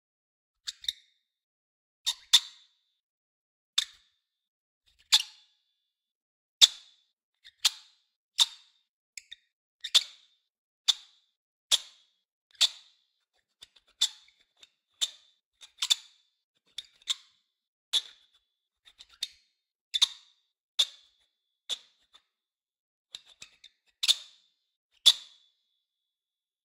Short and sudden twisting of a glas opening against another one with a slightly smaller but fitting opening.
Possible use as fragments/sublayer in sounddesign.